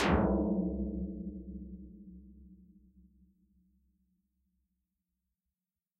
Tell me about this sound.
222065 Tom RoomHighReso 03
One of several versionos of a tom drum created using a portion of this sound
which was processed in Reason: EQ, filter and then a room reverb with a small size and very high duration to simulate a tom drum resonating after being struck.
I left the sounds very long, so that people can trim them to taste - it is easier to make them shorter than it would be to make them longer.
All the sounds in this pack with a name containing "Tom_RoomHighReso" were created in the same way, just with different settings.